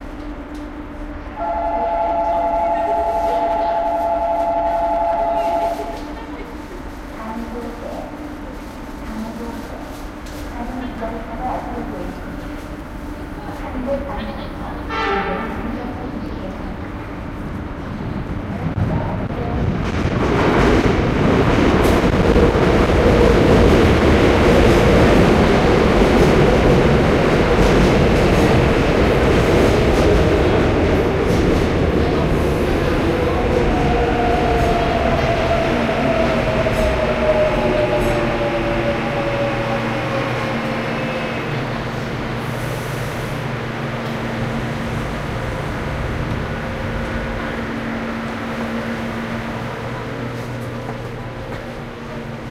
Subway Train Arriving - Pyeongchon Station, Seoul, South Korea
A binaural recording of the subway train arriving at Pyeongchon station in Seoul, South Korea. Like most stations in Seoul, it is underground and very reverberant. There is a sharp electronic bell and announcement over the loudspeaker before the train arrives.
transportation, korea, subway, binaural, seoul